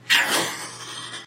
Missile / Rocket firing
An attempt at a missile being fired.